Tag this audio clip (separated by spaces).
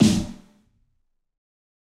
kit fat realistic sticks god snare drum rubber